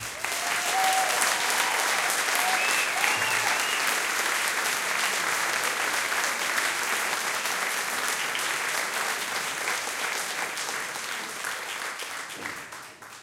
Theatre audience applauding after a song
applaud, applauding, applause, audience, auditorium, cheer, cheering, clap, clapping, claps, concert, crowd, hand-clapping, theatre